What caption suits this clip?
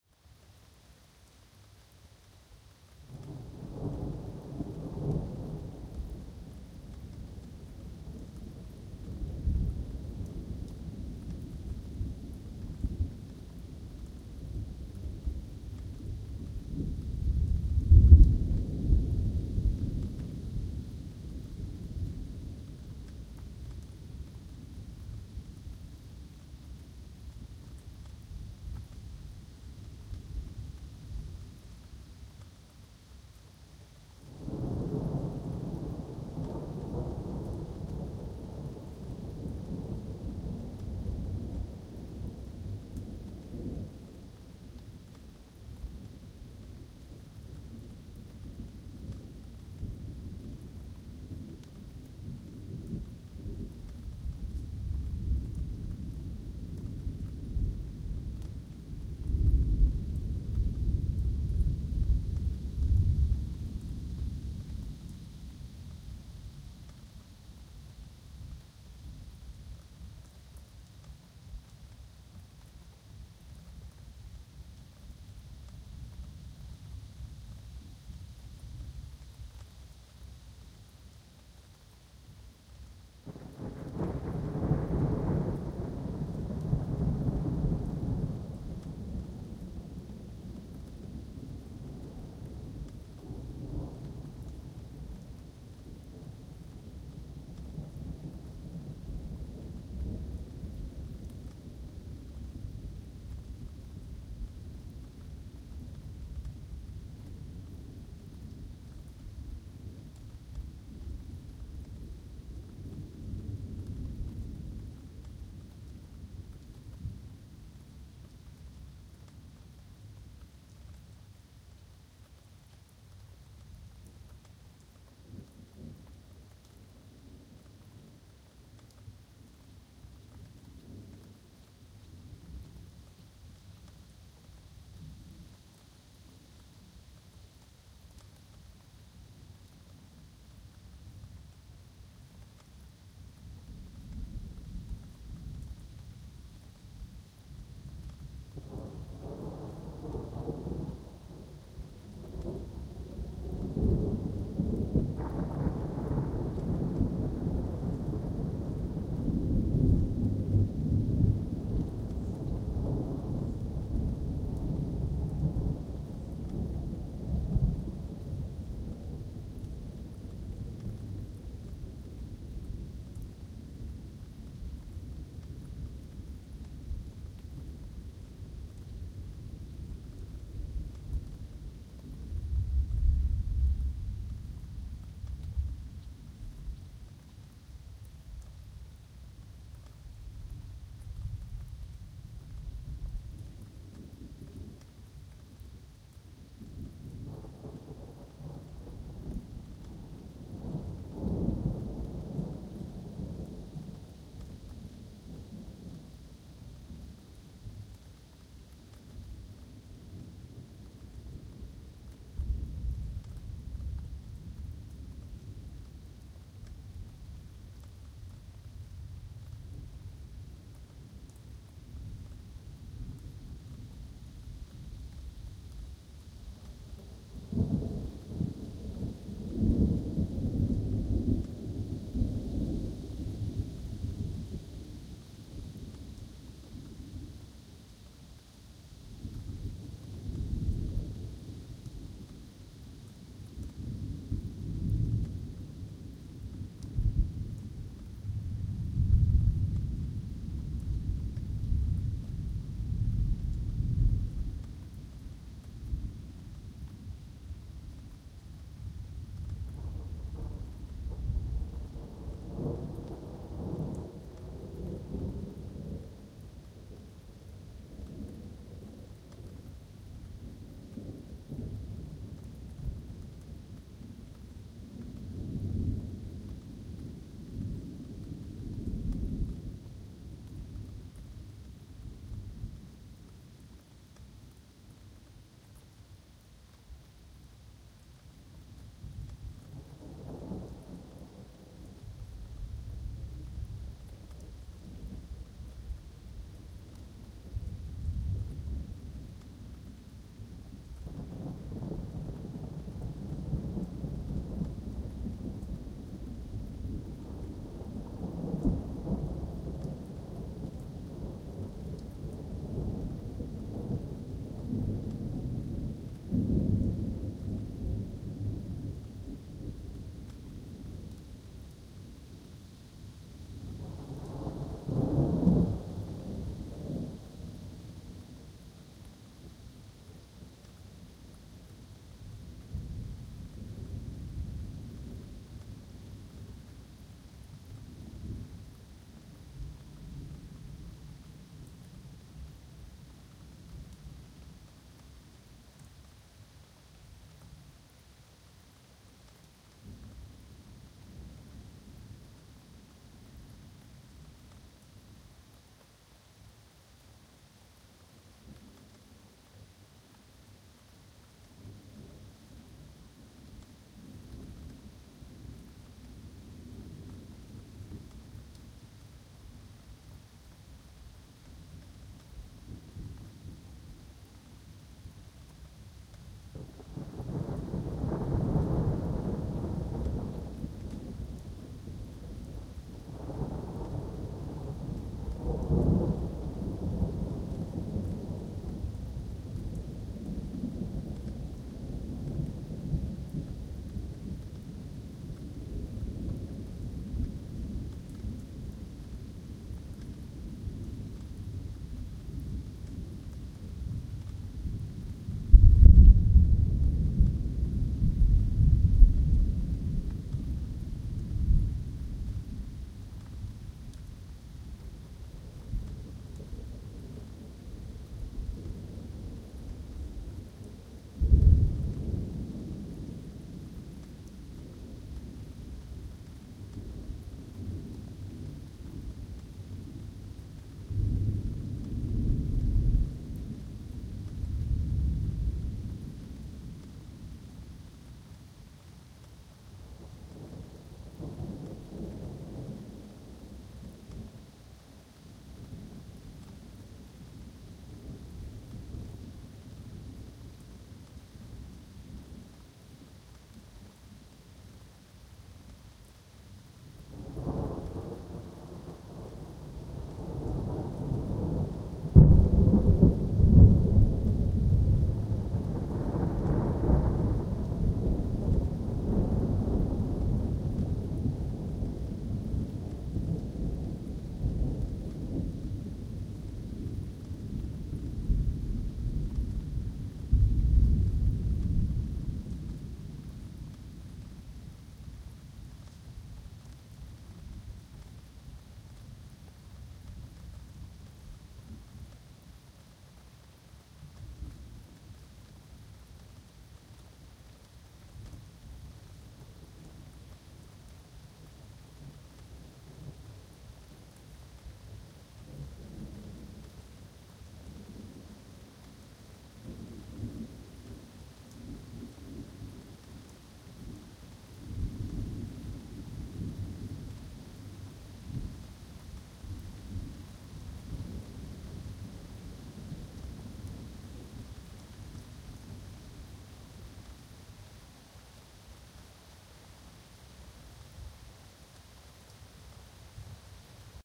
thunder rain wind cut
Summer thunderstorm, wind, and rain recorded at sunset in the woods.
chain: mic pair AKG414 -> Drawmer 1969 -> EQ EAR825 -> Manley Vary mu
it is free for listening and sound production
rain, thunder, wind